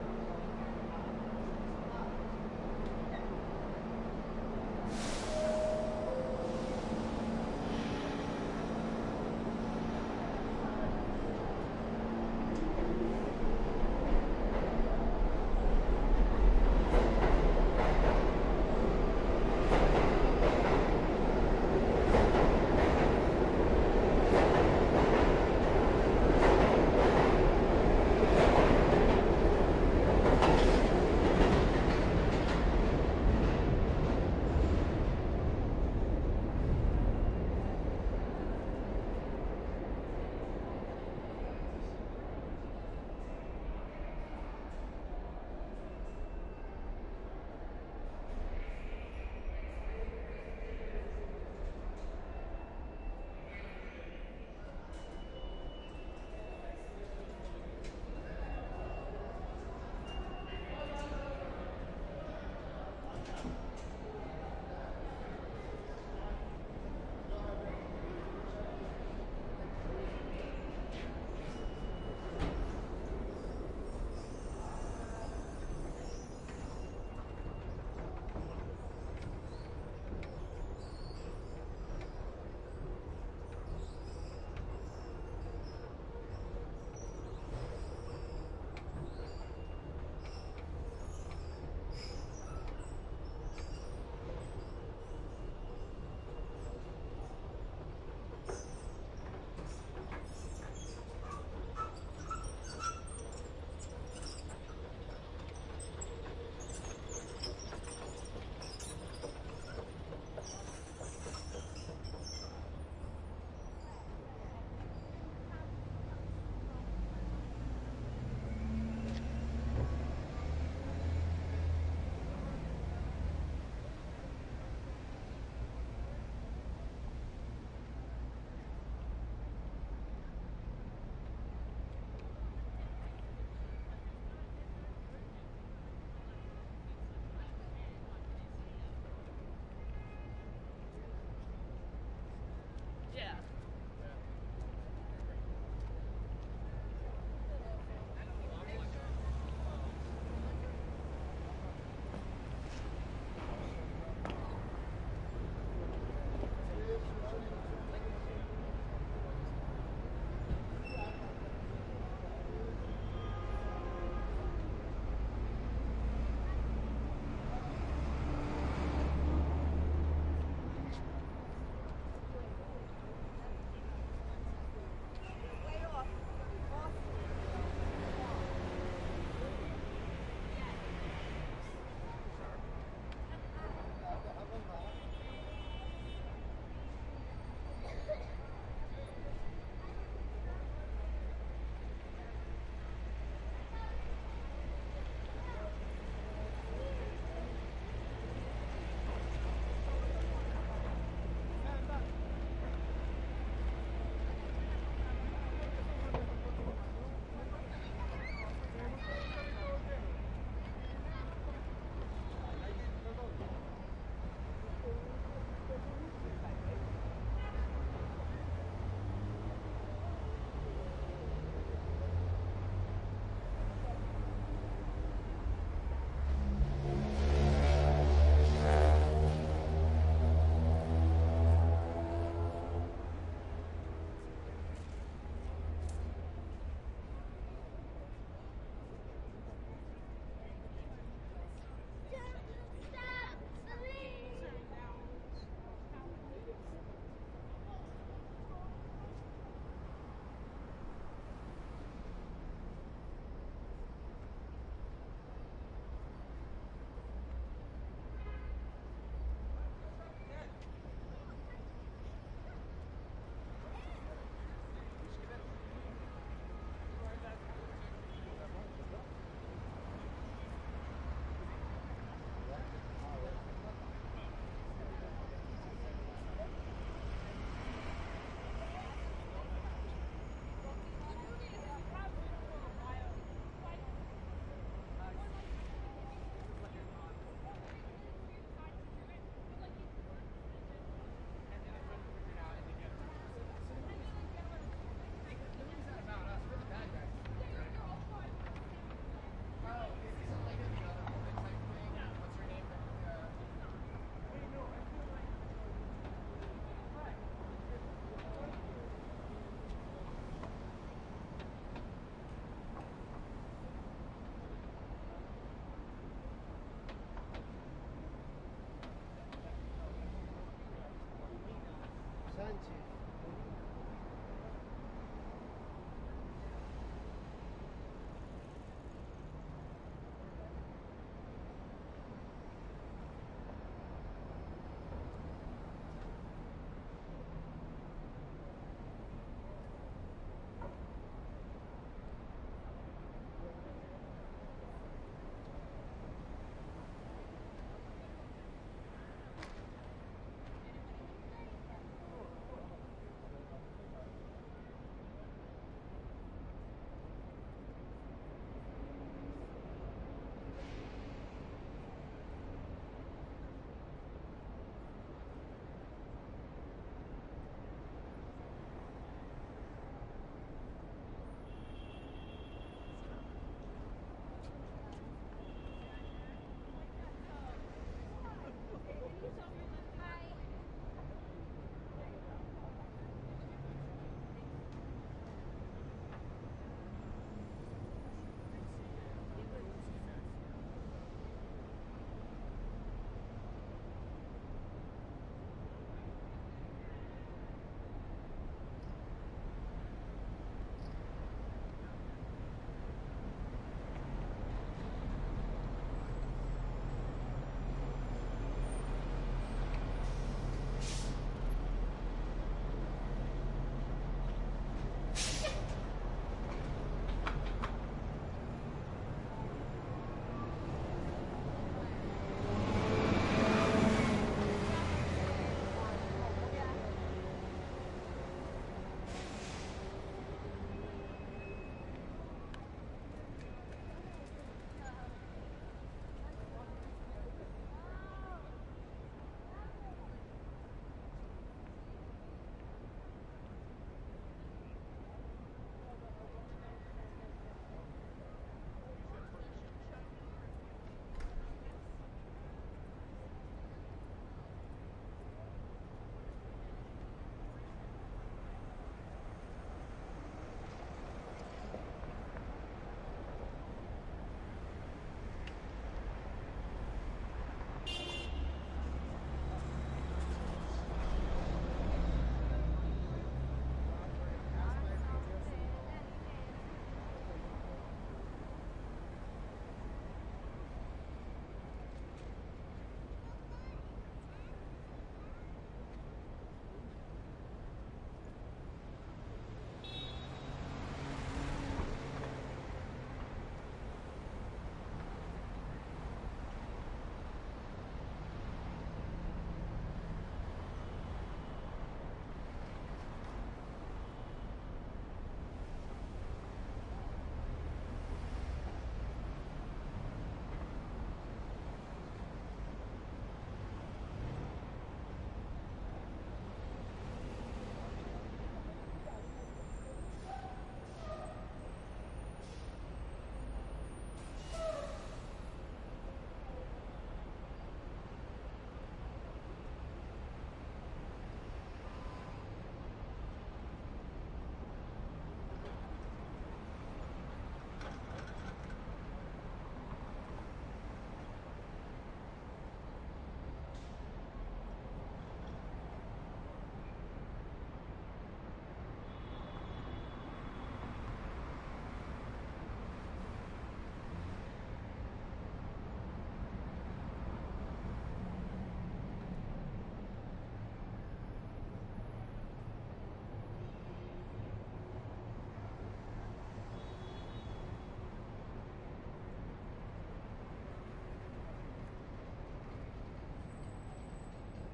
NYC Commute Harlem to 7th Ave Station Part 4
NYC Commute — Harlem to 7th Ave Station (Part 4 of 6)
Credit Title: Sound Effects Recordist
Microphone: DPA 5100
Recorder: Zaxcom DEVA V
Channel Configuration (Film): L, C, R, Ls, Rs, LFE
Notable Event Timecodes
PART 1: 01:00:00:00
01:00:00:00 — Header & Description
01:00:35:00 — Clear / 149th between Broadway & Amsterdam
01:01:10:00 — 149th and Amsterdam
01:02:56:00 — 149th and Convent Ave (Block Party)
01:03:35:00 — Convent Ave between 149th and 148th
01:04:15:00 — Convent Ave and 148th
01:05:25:00 — 148th and St Nicholas Pl (***features uncleared music in vehicle passby***)
01:05:52:00 — Entering 145th St Station Downtown
01:06:18:00 — Turnstile Entrance
01:06:29:00 — Running Down Stairs to downtown A Train
01:06:45:00 — Boarding Train
PART 2: 01:09:38:10
PART 3: 01:19:13:02
01:21:26:00 — Train Doors Open & Exit Train at 59th St / Columbus Circle